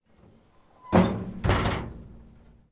Old Truck Metal Squeaks-Creaks-Rattle-Impact Close Door Impact
Found an old abandoned truck on a hike - recorded the squeaking and creaking of the doors opening and closing and stressing different parts of the metal. (It was done outdoors, so there may be some birds)